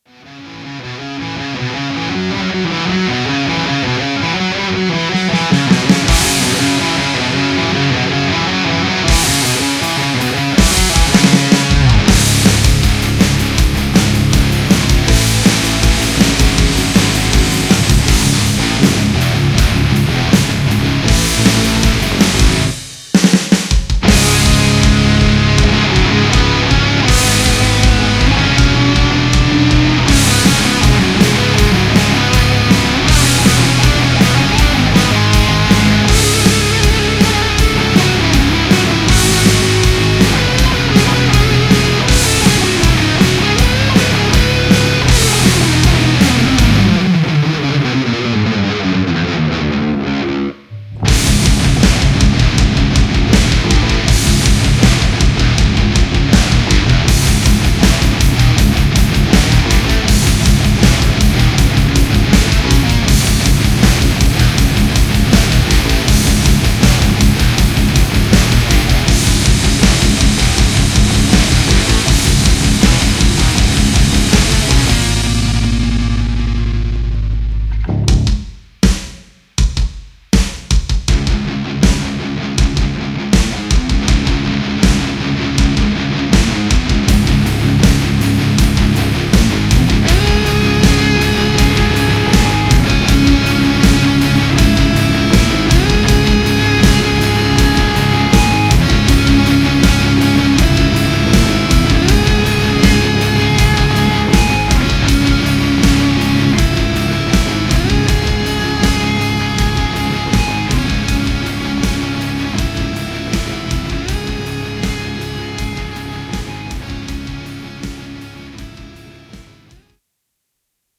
Heavy Metal Riffs - Monolith
bass-guitar, distorted-guitar, distortion, drum-kit, drums, groove, guitar, guitar-riff, hard-rock, heavy-guitar, instrumental, intro, metal-riff, music, power-chords, rhythm, riff, riffs, rock, rock-guitar, soundtrack, thrash-metal, trailer